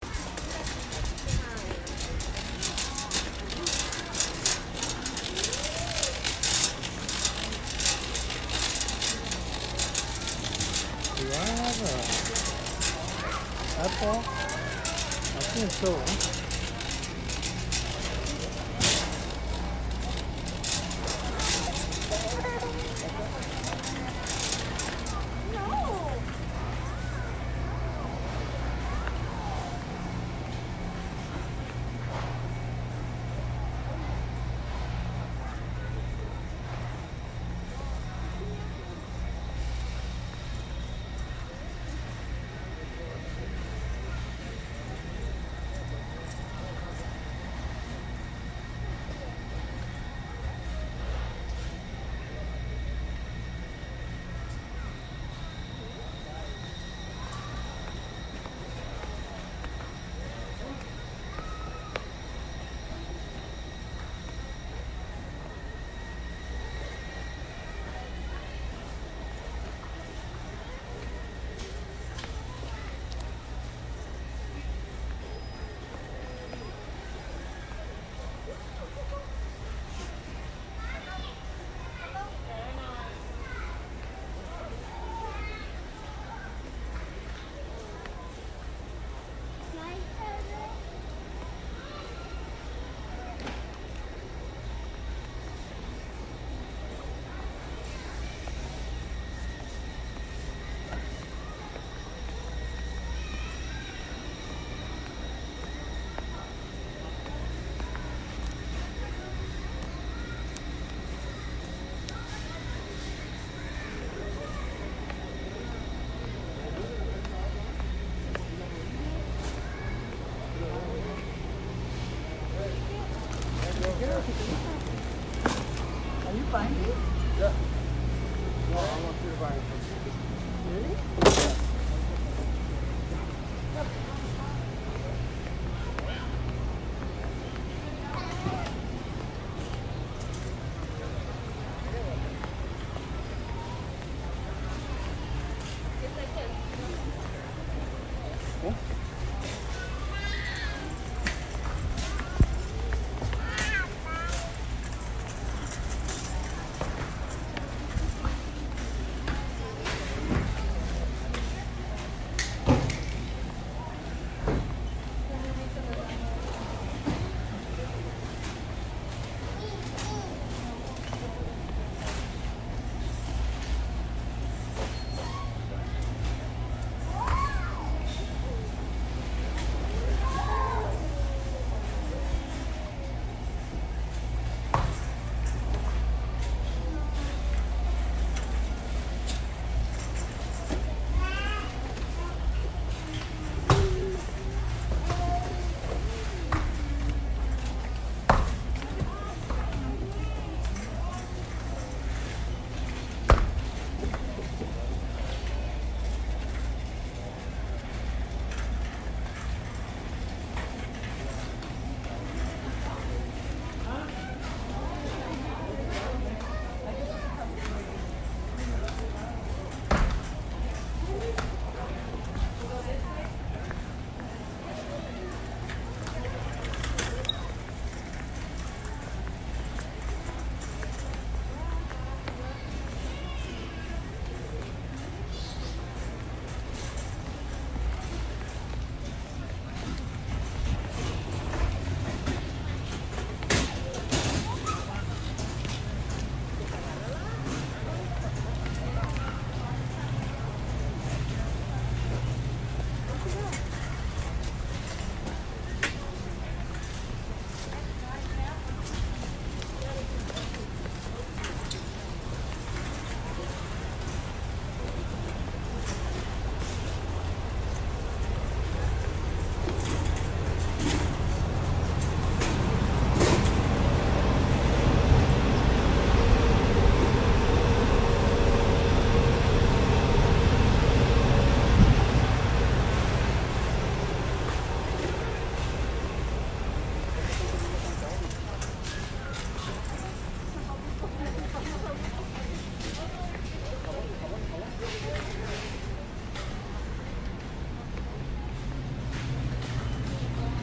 Walking Around Costco
Walking around in Costco. The bumpy sound in the beginning is the grocery cart.
At 00:28:114, I walk into that cold refrigerator/milk area.
walk, field-recording, shopping, ambient, ambiance, atmosphere, soundscape, background, people, ambience, general-noise, walking